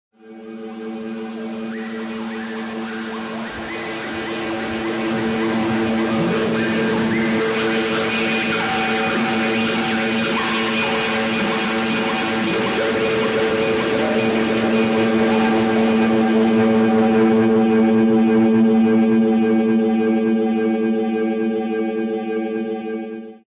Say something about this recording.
sound of the birds in SUN
future, radio, space, wave